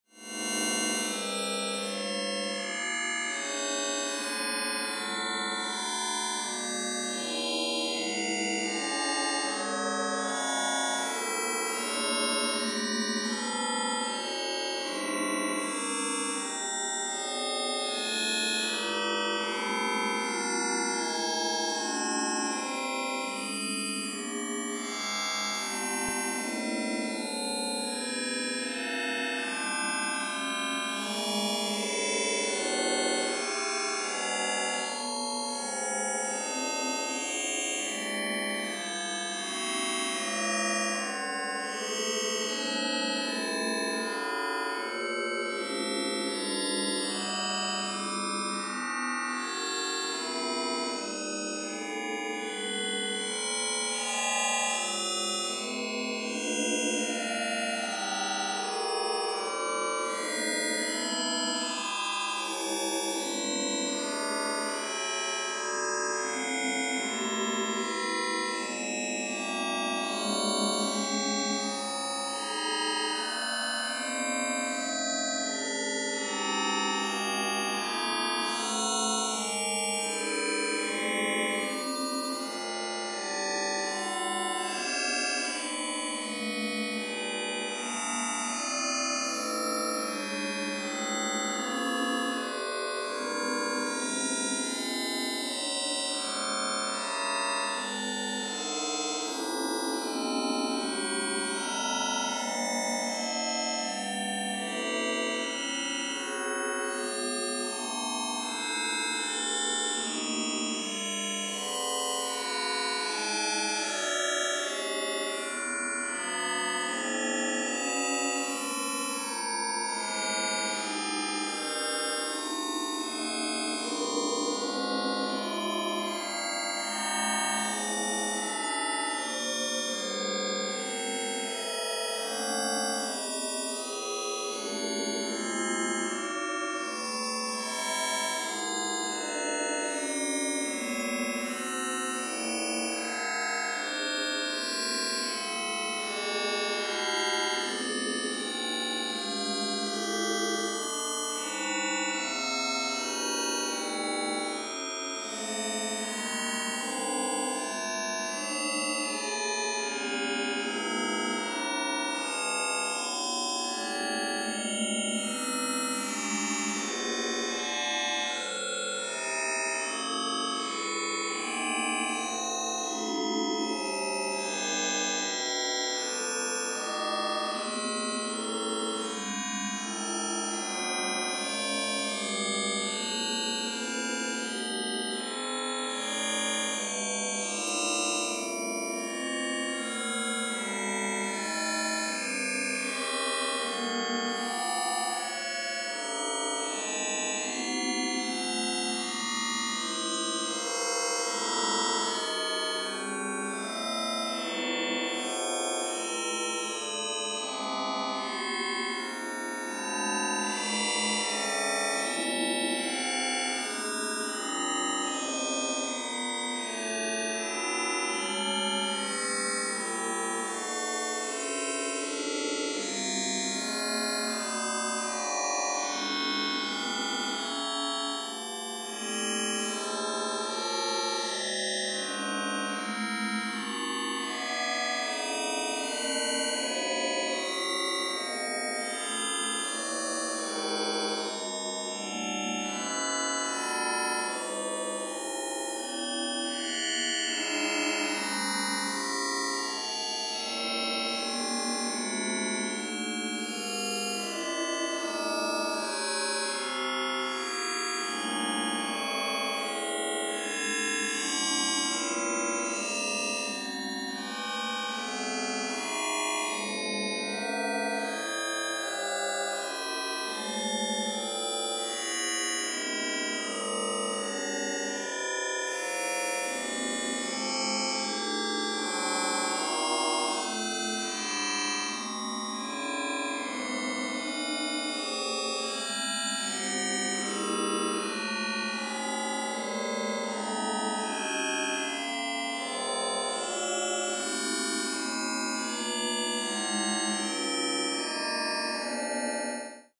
Another batch of space sounds more suitable for building melodies, looping etc. Star organ playing a little hymn.
loop, musical, sequence, sound, space